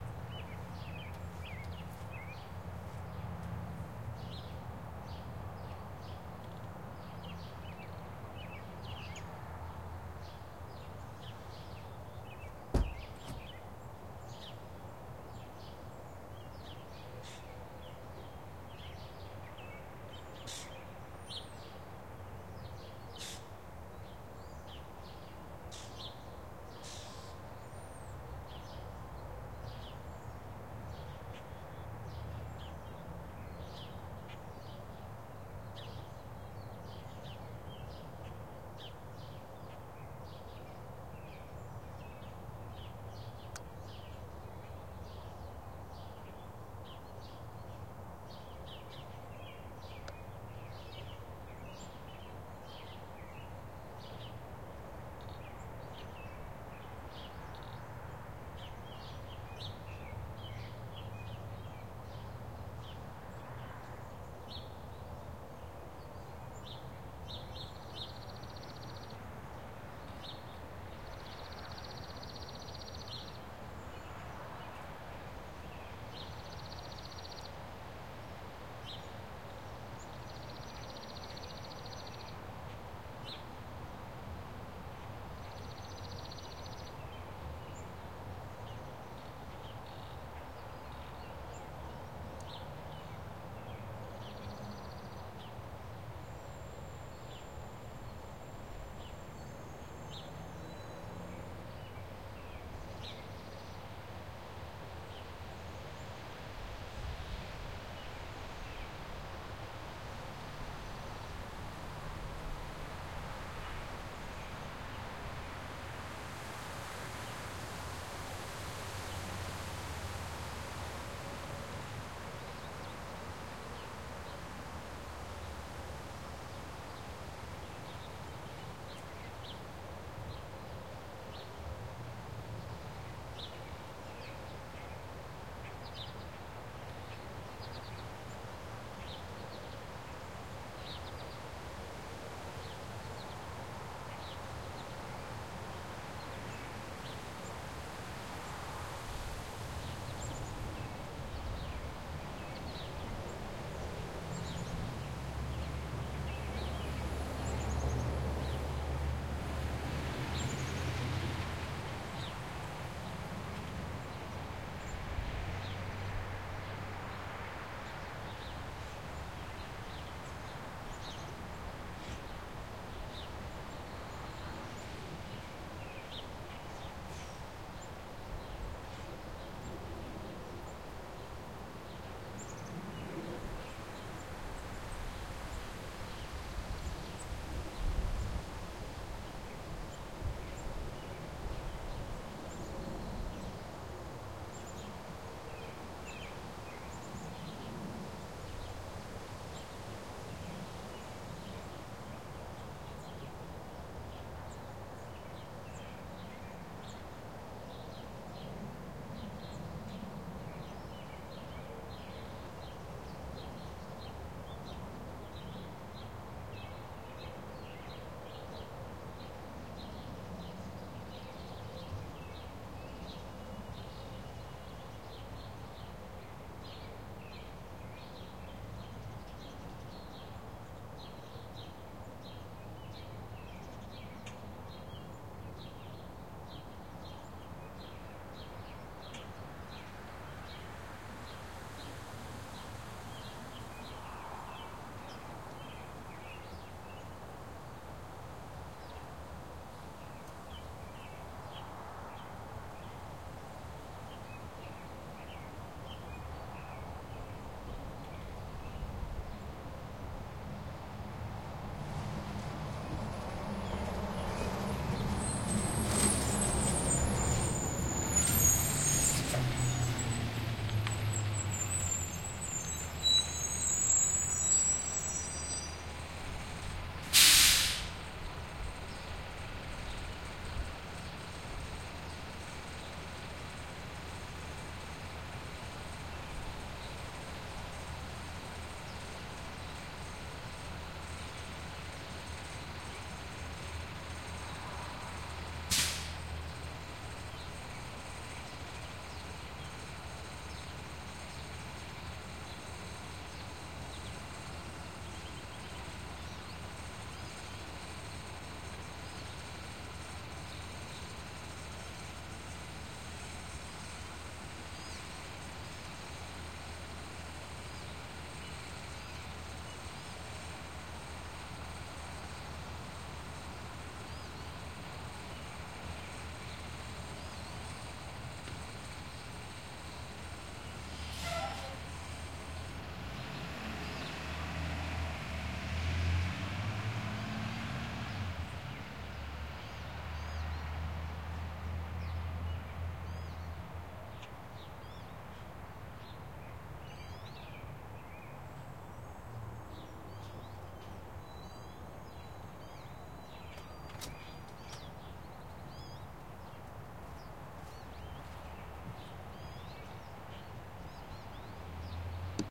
Driveway-Bus
School bus comes in around 4:20. Left recorded on a few minutes before the bus arrives so there are birds and a pickup truck and wind as well as background small town neighborhood traffic noise. Recorded with a TASCAM DR-07MKII
bus, school, hydraulics, traffic, field-recording, bus-stop, engine